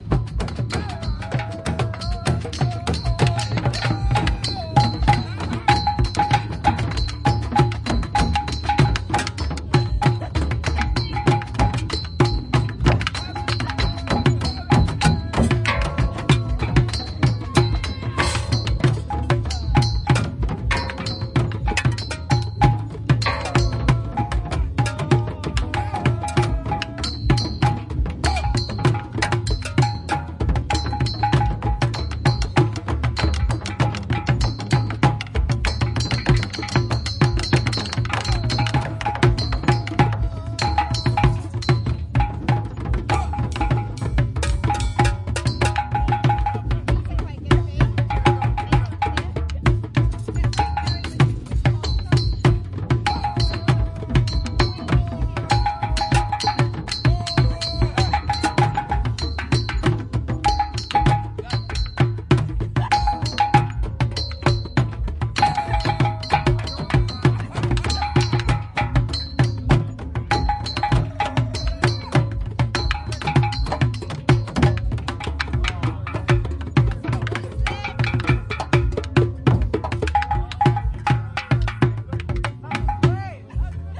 Trash drumming at Sark Roots Festival 2016 (pt11)
Trash drumming at Sark Roots Permaculture Festival 2016.
Recording of a set of interesting recycled objects mounted on scaffolding in the middle of the festival site. Recorded whilst festival was in full swing around the wildly improvising (mostly) amateur drummers on Saturday night
Recorded with a Tascam DR-40 portable recorder. Processing: EQ, C6 multi-band compression and L3 multi-band limiting.